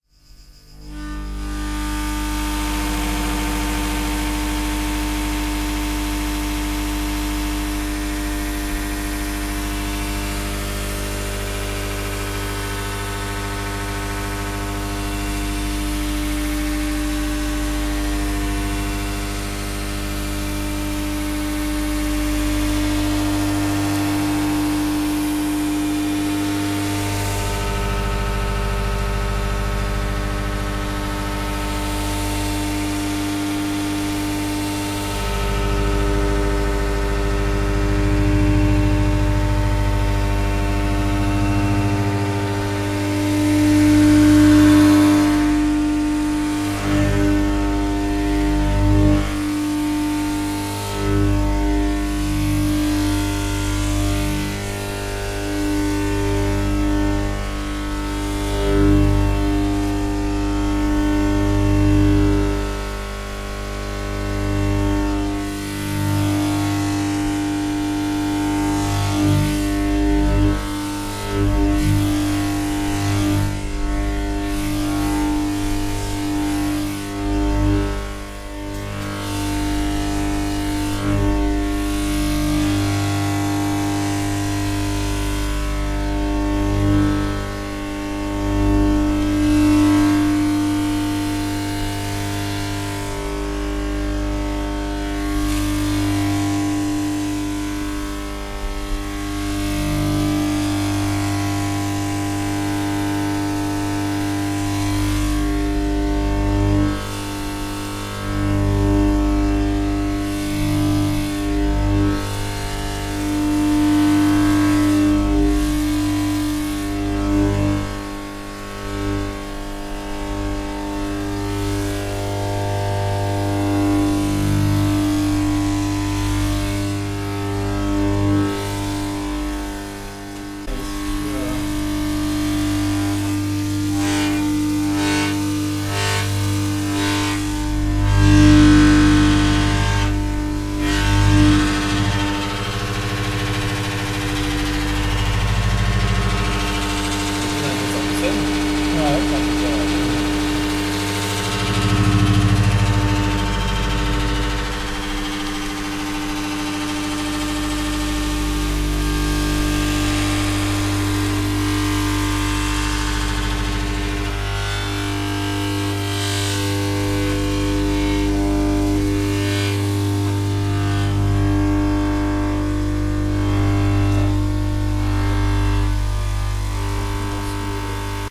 A strange machine recorded at different positions in beerserk brewery
bassy, beerserk, brewery, industrial, machine, roar